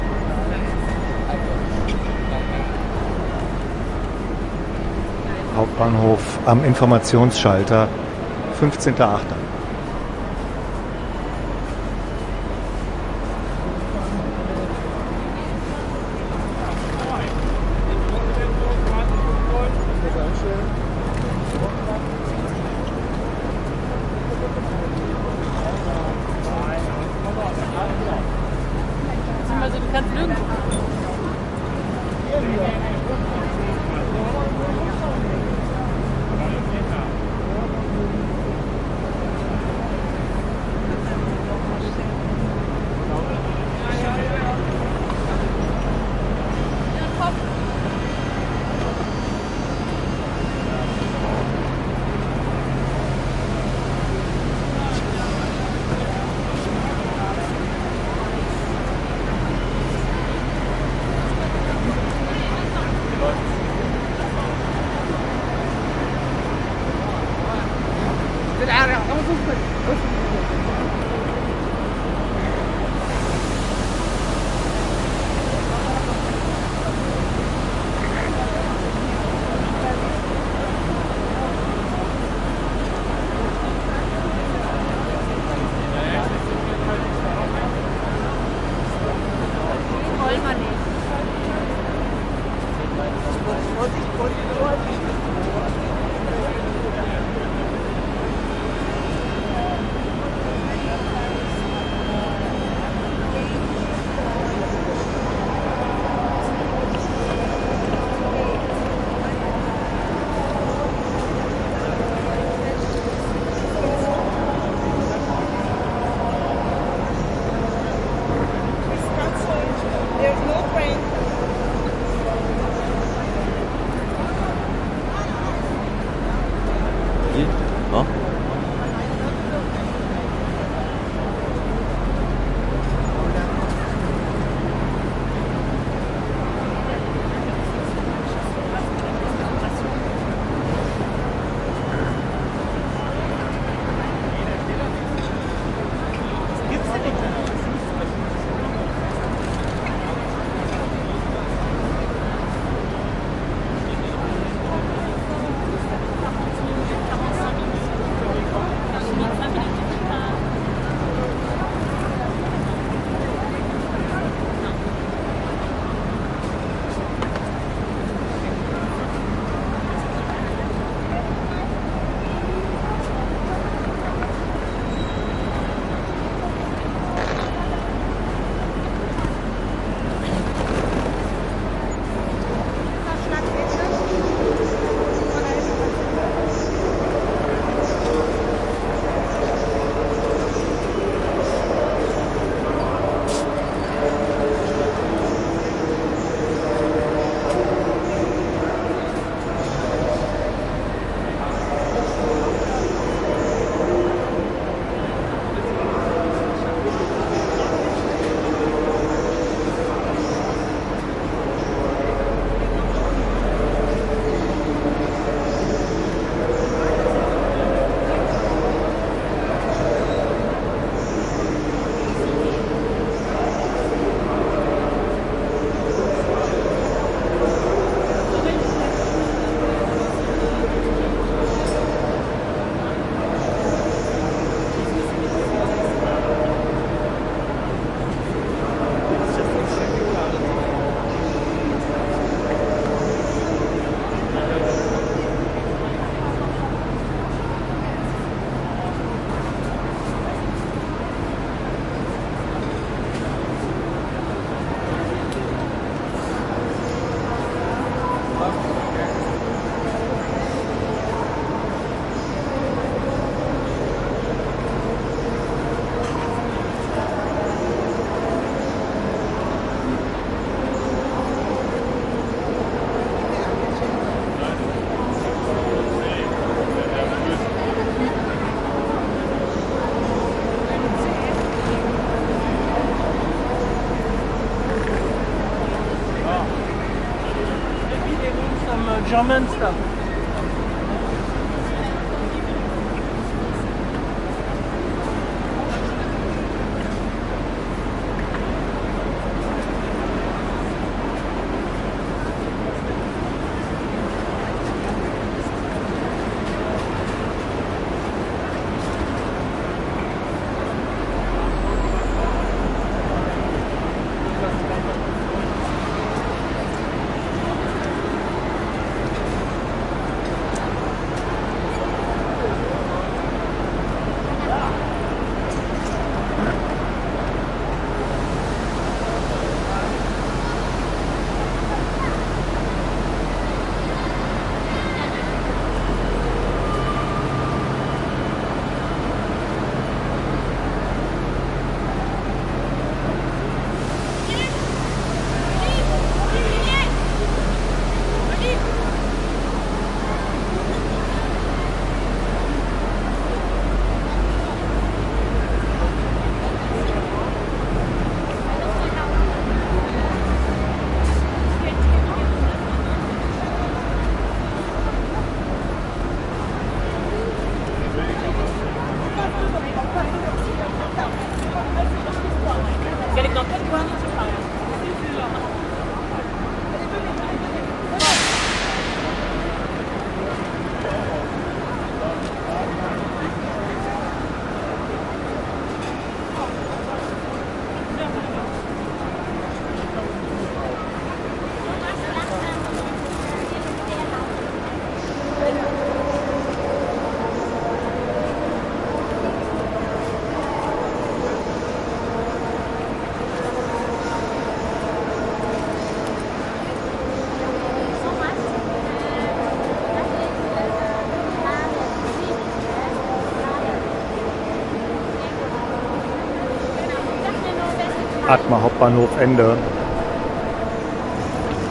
Frankfurt/Main, Germany, Main Station, Atmo near Info Counter.
Atmo Sound at Main Station. Near Info Counter.
Station, Train, Frankfurt, Main, Hall, Germany